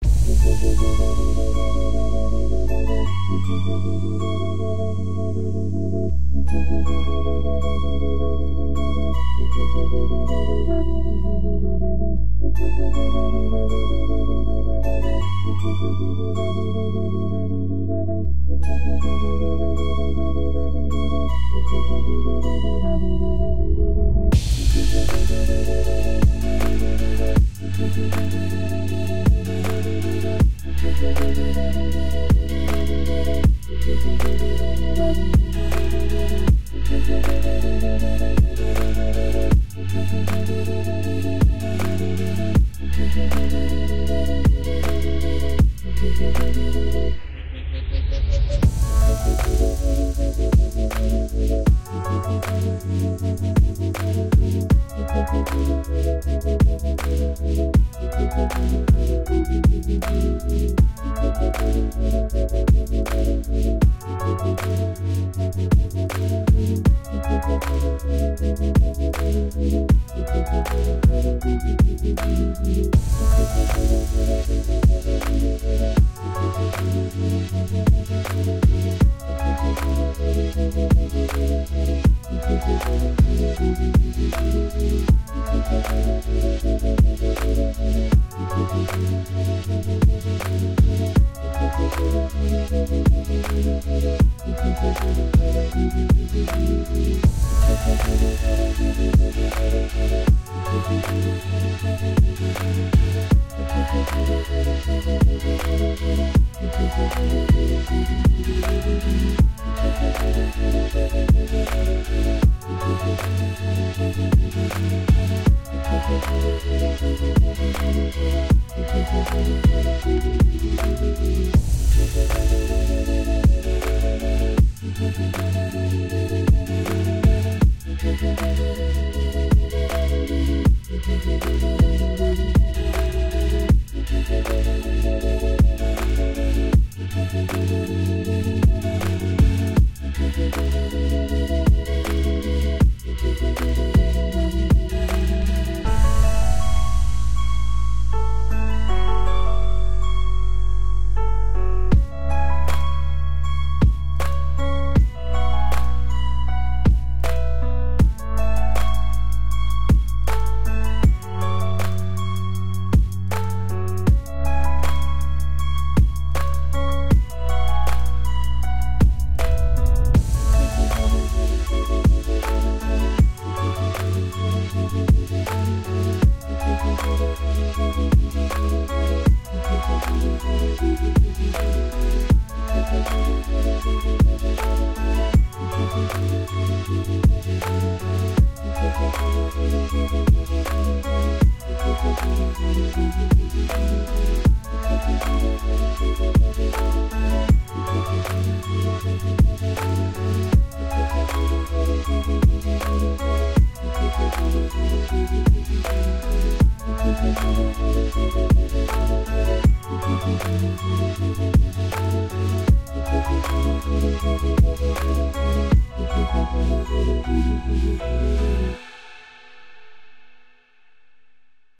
Future Chill Music
Genre: Future Chill
Been exploring different genres of music but it seems Future Chill is not my style.
background, future-chill, music